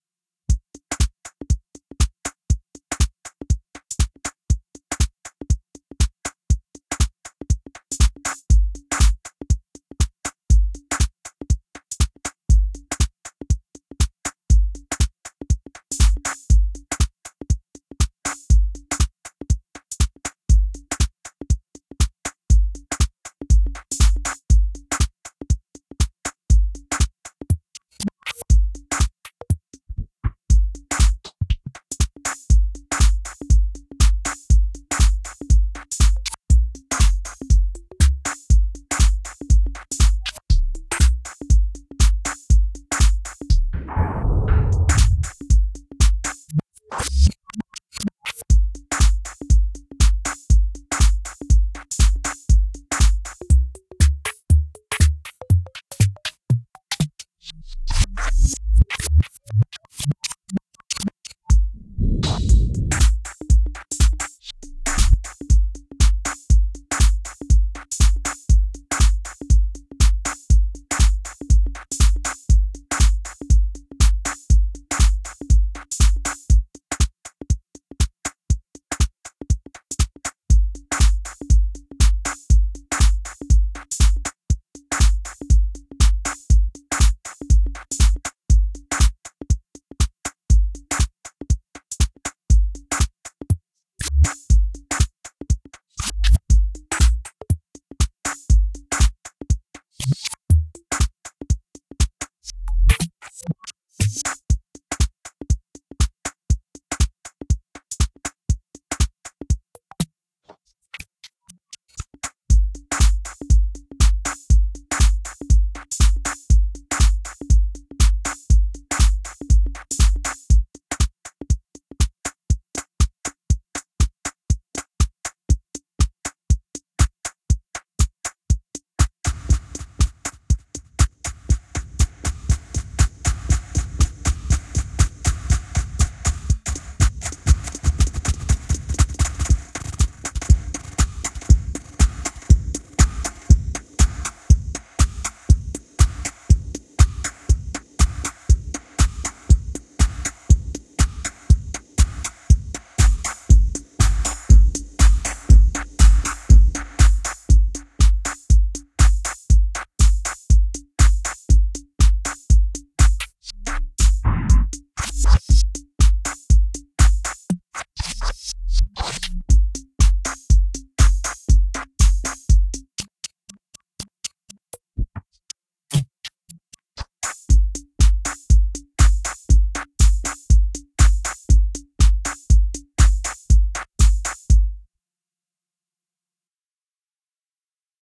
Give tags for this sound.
beats
disco
groove
techno

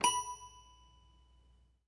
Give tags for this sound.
Carnival
Circus
packs
Piano
sounds
Toy
toy-piano

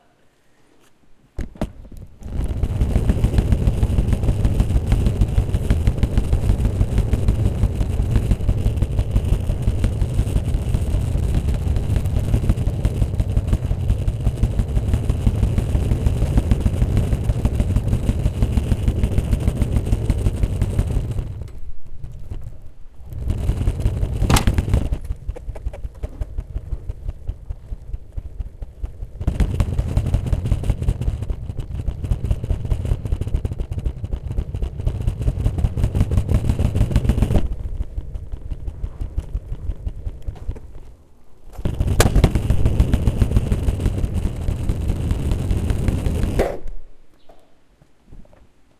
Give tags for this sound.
concrete
suitcase

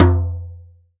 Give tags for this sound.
drum
kick
organic
tribal

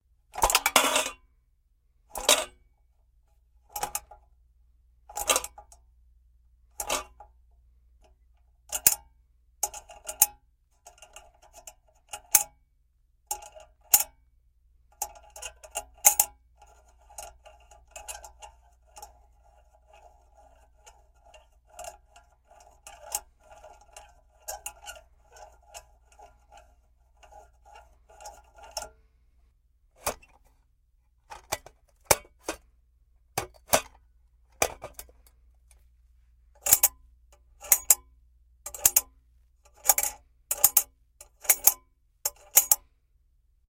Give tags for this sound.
clang
lantern
metal
metallic
swinging

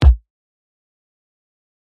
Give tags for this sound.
ZoomH4N
RodeNT3
tfg
Tecnocampus

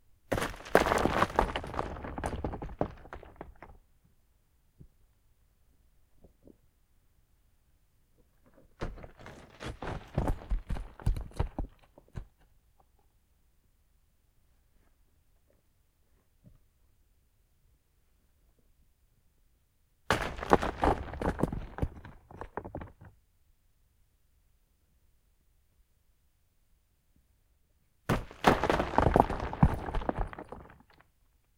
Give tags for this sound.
stone
field-recording

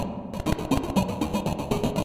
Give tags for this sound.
120bpm
delay
echo
loop
loopable
rhythmic
seamless-loop
strange
synthesized
synthetic

